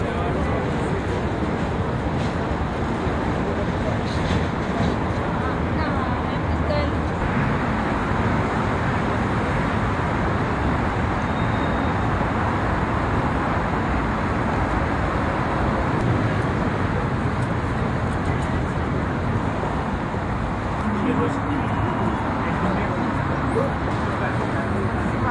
Paris Pedestrians
City of Paris, pedestrians in the street.
Pedestrians, City, Public, Paris, Drive, Ambiance